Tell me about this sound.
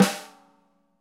I took my snare drum into the wonderfully echoey parking garage of my building to record the reverb. Included are samples recorded from varying distances and positions. Also included are dry versions, recorded in a living room and a super-dry elevator. When used in a production, try mixing in the heavily reverbed snares against the dry ones to fit your taste. Also the reverb snares work well mixed under even unrelated percussions to add a neat ambiance. The same goes for my "Stairwell Foot Stomps" sample set. Assisted by Matt McGowin.
garage, reverb